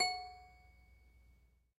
This lovely little toy piano has been a member of my parent’s home since before I can remember. These days it falls under the jurisdiction of my 4-year old niece, who was ever so kind as to allow me to record it!
It has a fabulous tinkling and out-of-tune carnival sort of sound, and I wanted to capture that before the piano was destroyed altogether.
Enjoy!